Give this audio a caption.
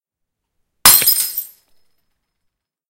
Glass Smash, Bottle, F
Raw audio of dropping a glass bottle on a tiled floor.
An example of how you might credit is by putting this in the description/credits:
The sound was recorded using a "H1 Zoom V2 recorder" on 19th April 2016.
Smash, Smashing, Tile